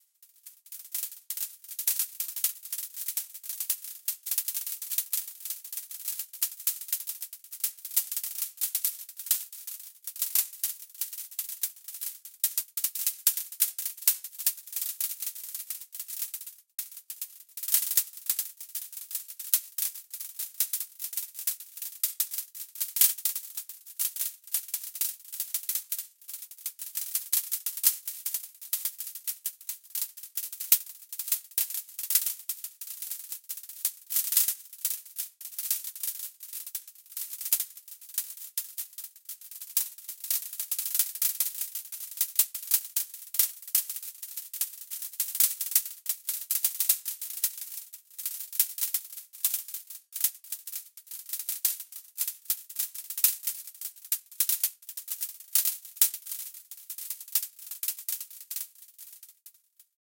This sample is part of the "Space Drone 3" sample pack. 1minute of pure ambient space drone. Short small noisy bursts. Quite minimal.
space, ambient, drone, reaktor, soundscape